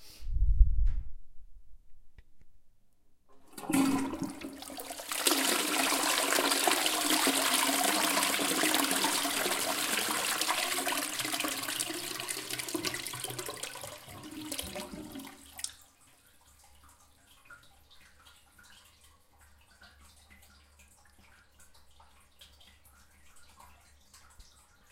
Toilet Flush Small room
Toilet flushing in small tiled room.
bathroom, drip, flush, funny, gush, plumbing, Toilet, water